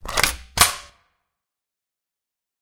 Chik-Chak 1 (Gun Slide)
A chambering sound effect with low frequency filer "Cherby" bandpass amplified with hard limitation and mixed with dry/wet dial/slider to modulate the signal and finally, a small dashing of low dampened reverb, giving the produced sound effect of what could be a firearm operator holding the chambering slide back or the lever to load the ammunition into the frame of the gun.
The base foley article is a common office stapler, you'd be suprised how effectively similar it replicates the mechanical elements of guns when pitched around 50-65% of its original rate down. As a tip, if you were to pitch it up (or down from your own recordings) by that percentage, you'd get likely get the foley itself (try pitching up or down with some films, game sounds and even music and you can sometimes figure out what they use!)